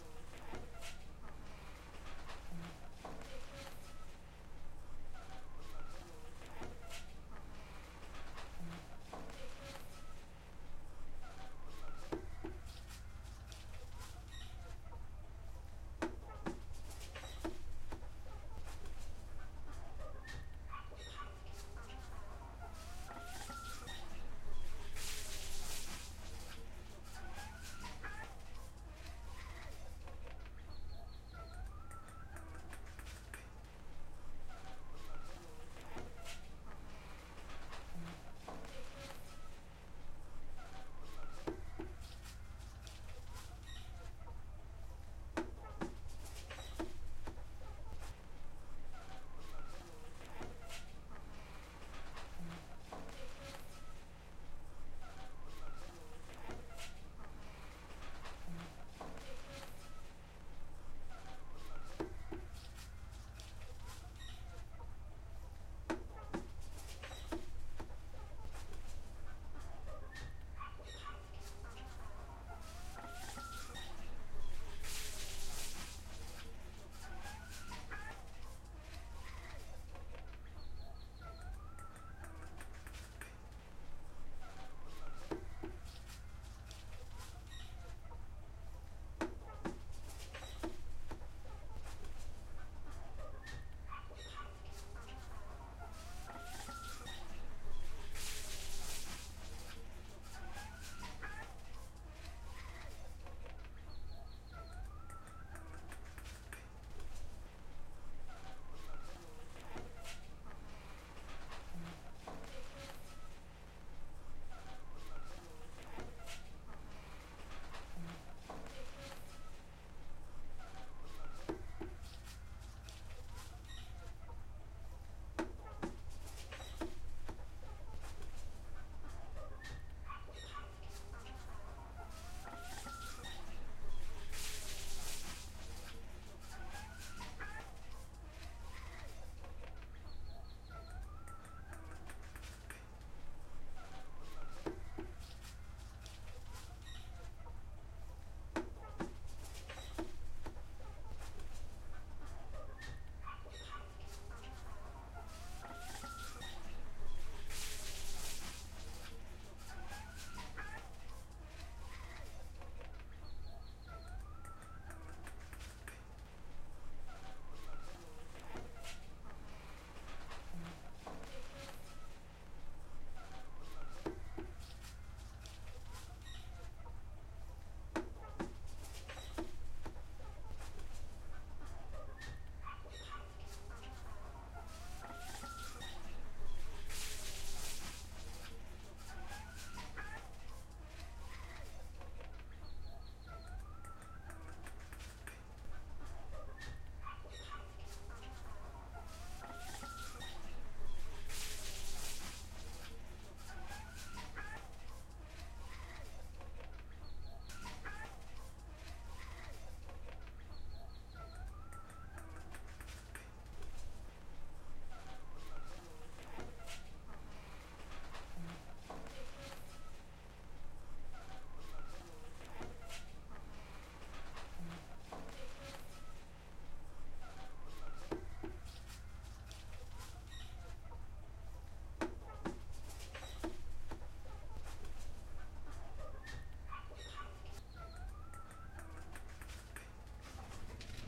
ambience rural house outdoors noon
Outdoors ambience sound of a woman cleaning the hall of her house in a rural village of Nicaragua.
afternoon, ambience, bird, dog-bark, footsteps, parrot, water-splash, woman-voice